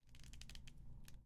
setting up the shot